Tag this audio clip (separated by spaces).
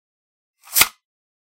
interactions recording player